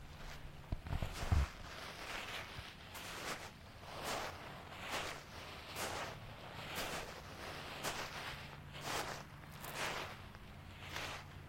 Walking through pebbles at Lake Erie, Buffalo shoreline. Late Spring 2018 in the evening
steps, stones, snow, beach, field-recording, sand, texture, gravel, shore, walking, nature